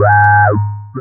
PPG 018 Acidic Bleep Tone G#2
This sample is part of the "PPG
MULTISAMPLE 018 Acidic Bleep Tone" sample pack. It make me think of a
vocoded lead and/or bass sound with quite some resonance on the filter.
In the sample pack there are 16 samples evenly spread across 5 octaves
(C1 till C6). The note in the sample name (C, E or G#) does indicate
the pitch of the sound but the key on my keyboard. The sound was
created on the Waldorf PPG VSTi. After that normalising and fades where applied within Cubase SX & Wavelab.
multisample, vocoded, lead, ppg, bass